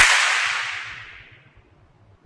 More impulse responses recorded with the DS-40 both direct to hard drive via USB and out in the field and converted and edited in Wavosaur and in Cool Edit 96 for old times sake. Subjects include outdoor raquetball court, glass vases, toy reverb microphone, soda cans, parking garage and a toybox all in various versions edite with and without noise reduction and delay effects, fun for the whole convoluted family.
ir, free, convolution